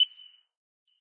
Short beep sound.
Nice for countdowns or clocks.
But it can be used in lots of cases.
f, counter, computer, digital, beeping, select, menu, hit, bit, beep